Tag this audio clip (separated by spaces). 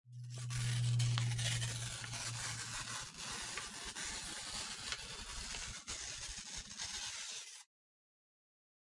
magia magic